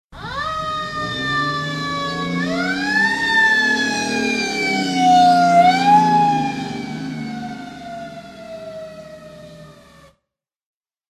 Fire Truck (Siren)
A fire truck approaching
siren, alarm